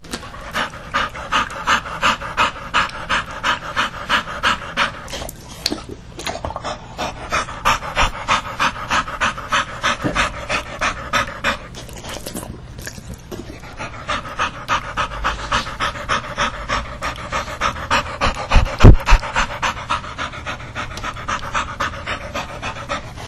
This is my Old Victorian Bulldogge Ruby panting after playing outside. She loves to run and gets winded! We would love to know how you use the sound.